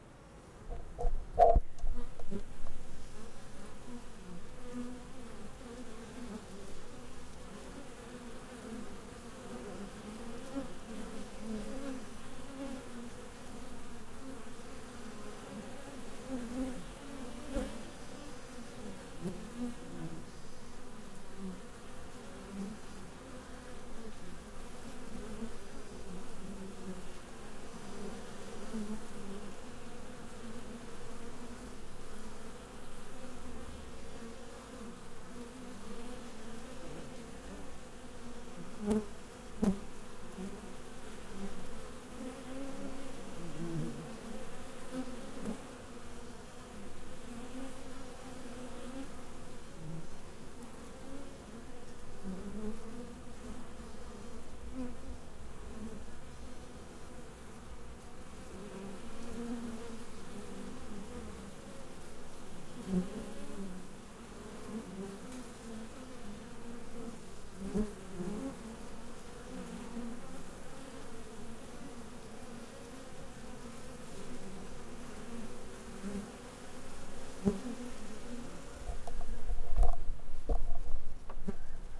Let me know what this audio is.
Ambeo recording of the entrance of a beehive in autmn while wesps were attacking to kill some bees and drag them to their hive. Recording made with an Sennheiser Ambeo VR Mic on a Zoom f8. Handling noises at the beginning and the end.